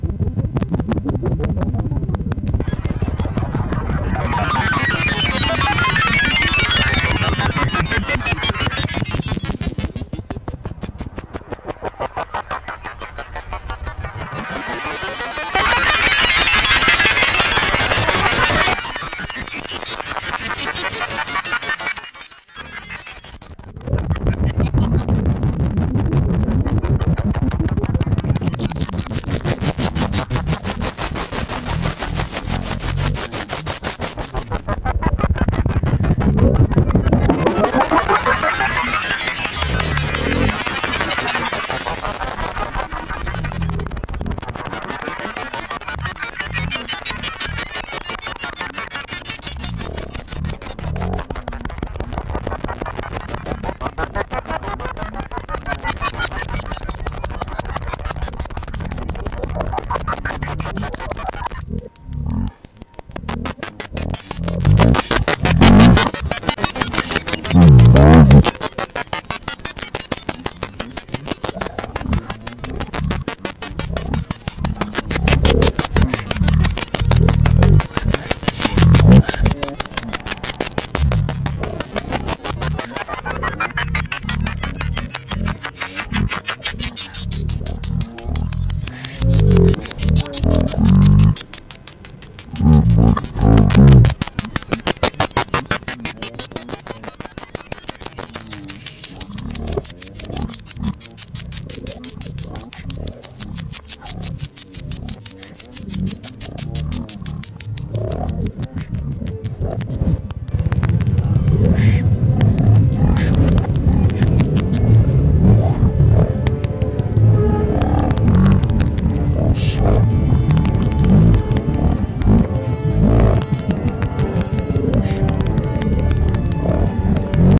Jabba di Hut speaks on oper premiere
Broadcasting from Jabba di Hut's planet. Radio techies work hard to clean from hostile disturbing signals. Finally they could sort out Jabba's voice and instruments in the background.
Intergalaxy, Starwars, Jabba, Galaxy, broadcasting, Hut, di, Space